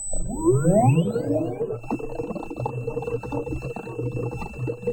ninebot z10 electric wheel startup JRF contact RXed
Ninebot Z10 electric wheel recorded with Jezz Ryley French stereo contact mics + art audio dual active dbox + sound devices mixpre6
wheel, drive, spinning, EUC, electric, motor, science, future, contact-microphone